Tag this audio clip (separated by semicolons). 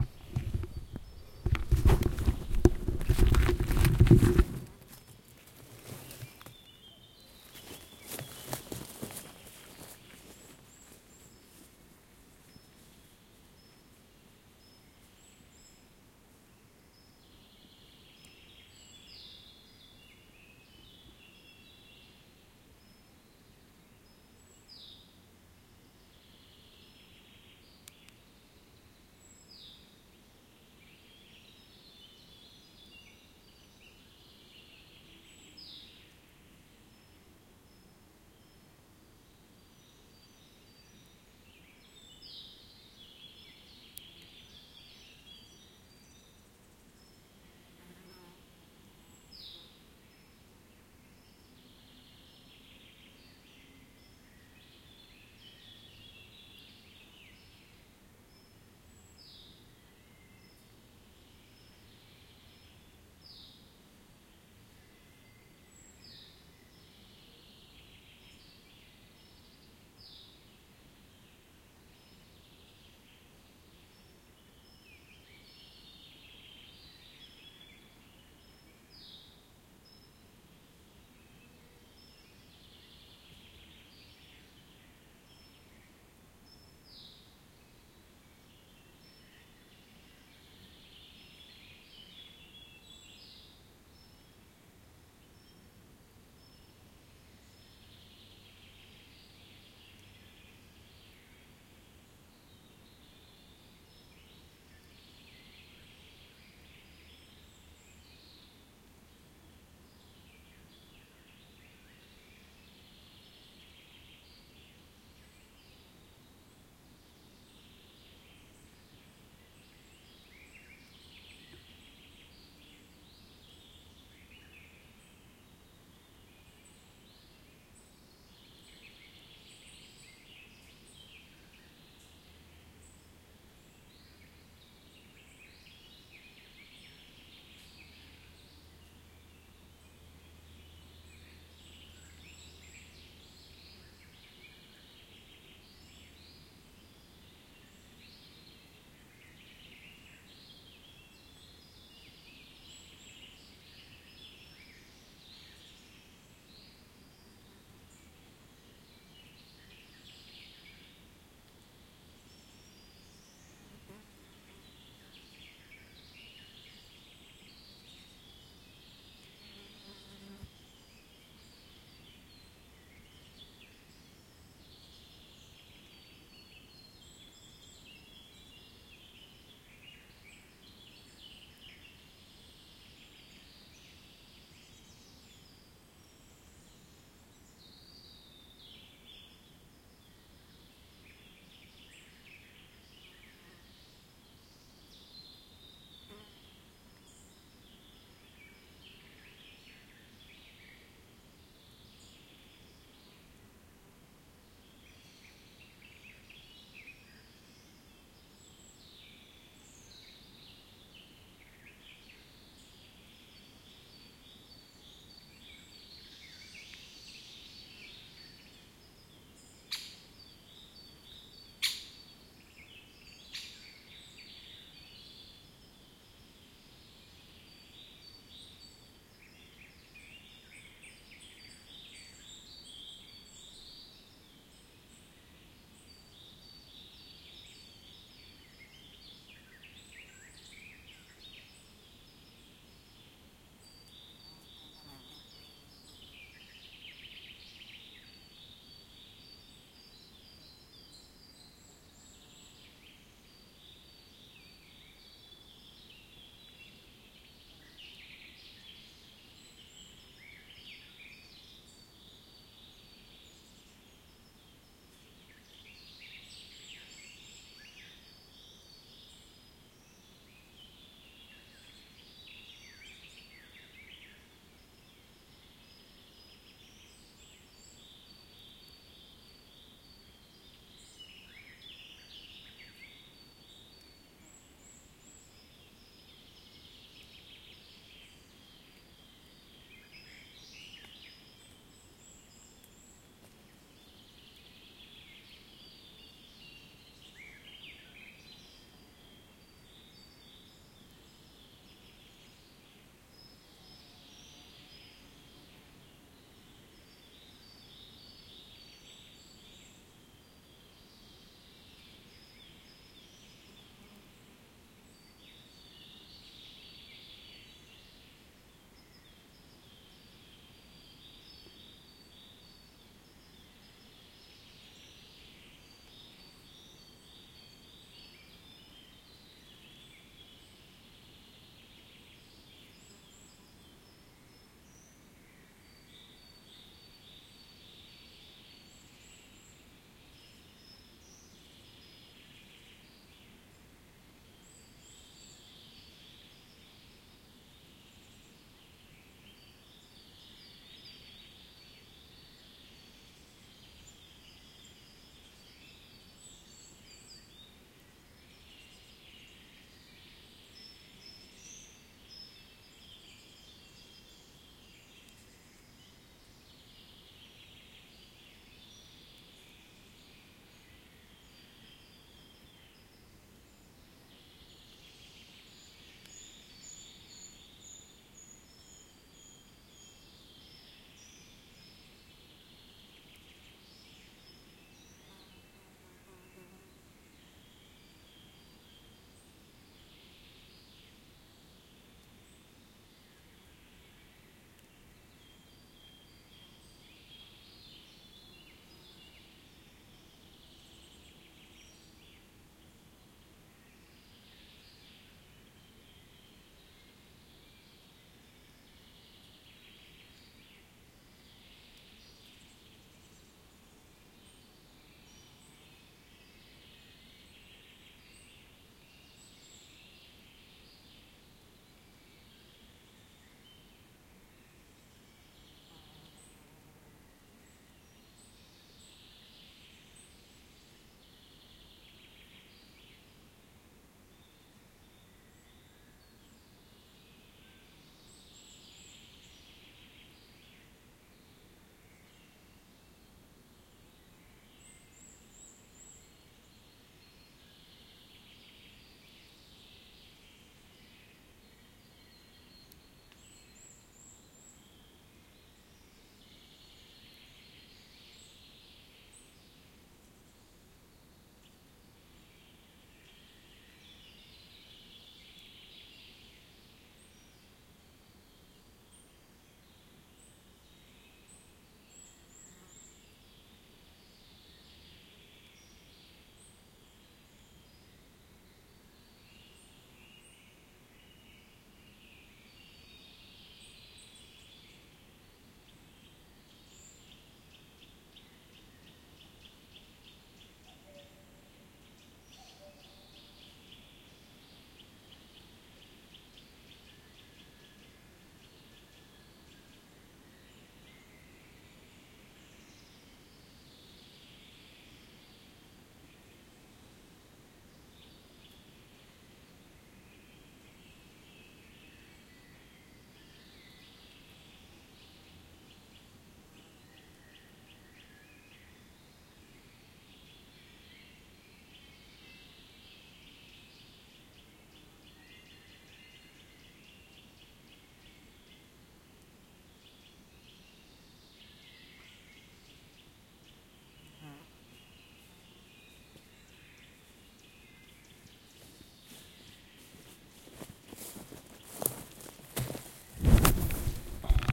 birds; field-recording; sound; ambient; forest; summer; nature; deep